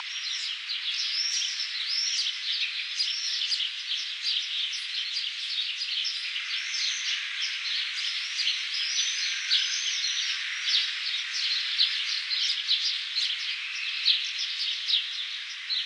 A bunch of birds (sparrows, blackbirds, swallows and some more) singing in glorious stereo. No low frequencies, just birds.
ambience, gorrion